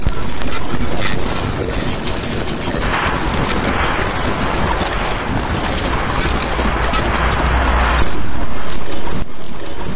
busy 2-bar loop with noise and glitchy panned sounds; made with Native Instruments Reaktor and Adobe Audition
busy, sound-design